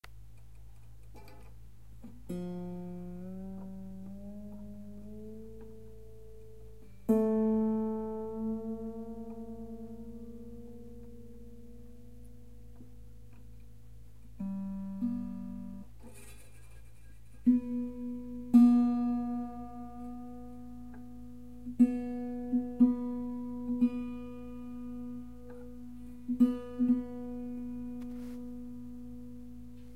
1035-new guitar strings
Replacing and tuning new acoustic guitar strings.